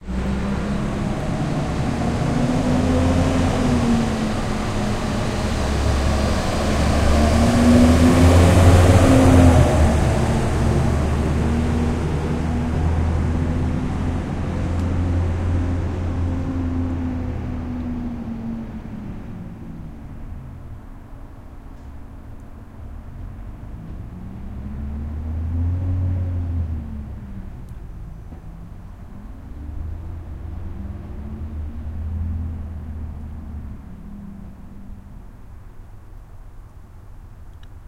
A large truck (a garbage truck if I remember right) driving by. In stereo